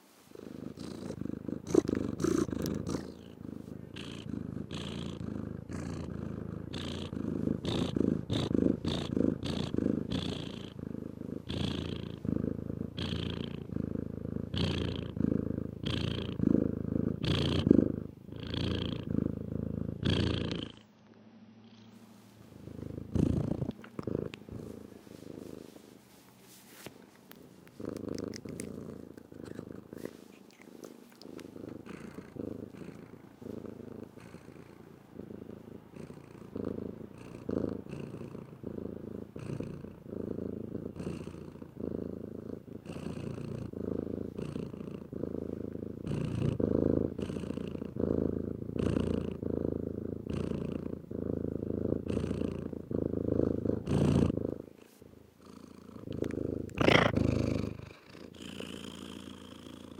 my cat purring
field-recording, purring, cat